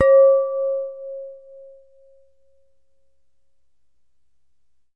23cm glass bowl - tone - cloth mallet 02
A tone created by striking a 23cm diameter glass bowl with a cloth mallet.